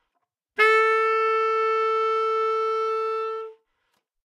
Part of the Good-sounds dataset of monophonic instrumental sounds.
instrument::sax_tenor
note::A
octave::4
midi note::57
good-sounds-id::5188
Intentionally played as an example of bad-attack